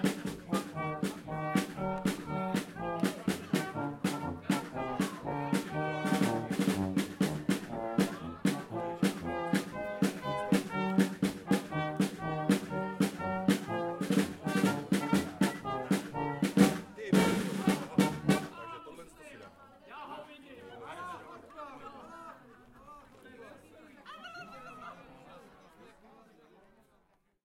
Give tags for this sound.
brass-band drum trumpet band

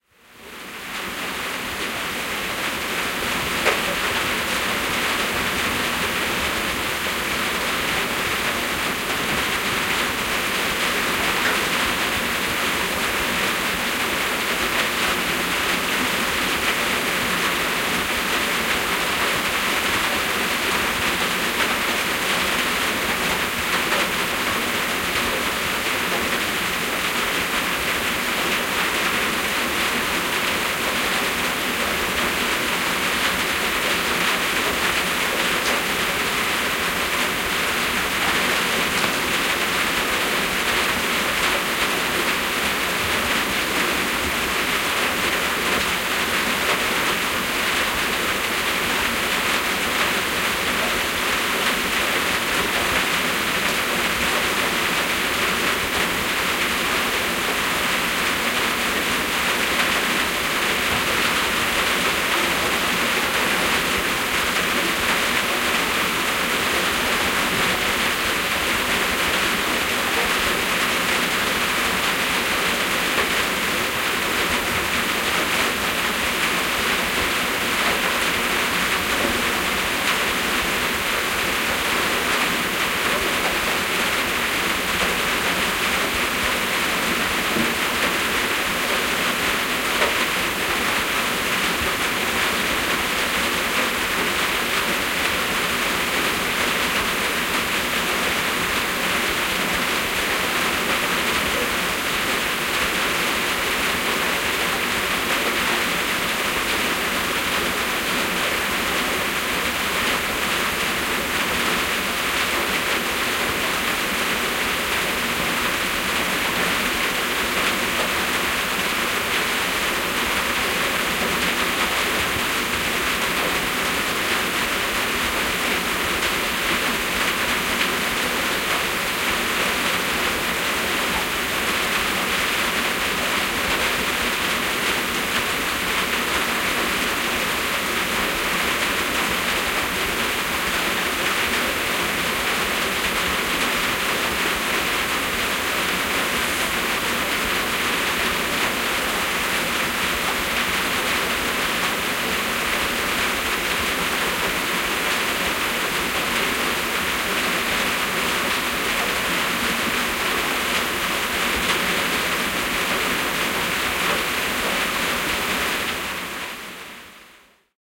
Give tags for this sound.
Pelti Peltikatto Roof Tin-roof Yleisradio

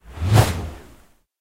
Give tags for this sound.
Air Flying Helicopter Machines Move Plane Woosh

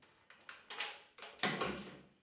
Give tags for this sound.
recording field bathroom shower

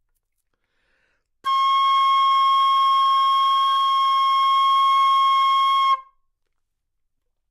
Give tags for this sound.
C6 flute good-sounds multisample neumann-U87 single-note

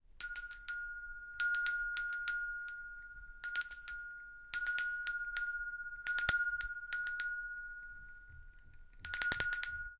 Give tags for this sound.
bell chime

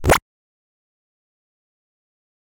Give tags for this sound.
8bit pick videogame

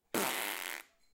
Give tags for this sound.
puke farts wind bowel flatulate gas flatus break-wind fart bugger

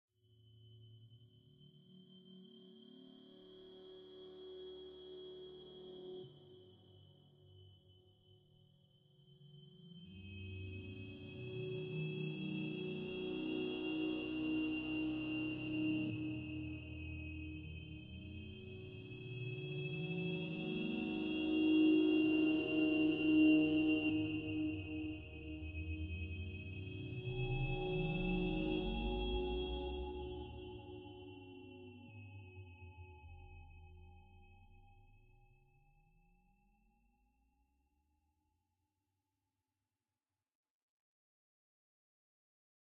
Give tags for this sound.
ambient eerie soundscape evolving drone pad experimental space

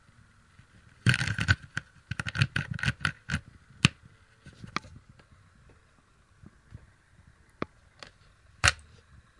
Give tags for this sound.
computer headphones

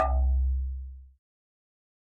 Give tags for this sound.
instrument percussion wood

